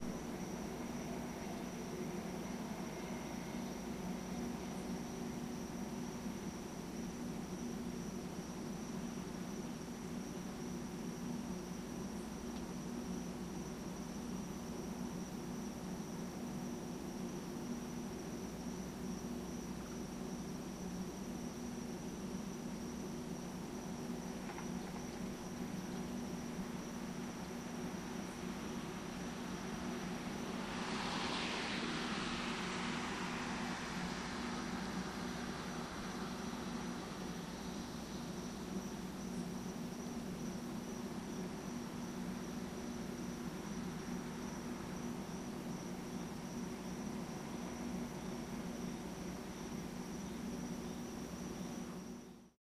Police helicopter and a dozen cop cars, including a K-9 unit searching the hood, recorded with DS-40 and edited in Wavosaur. Where oh where did the chopper go, oh where oh where can it be?